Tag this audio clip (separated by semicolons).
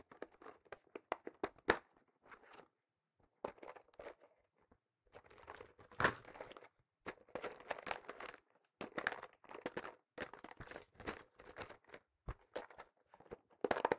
close; wooden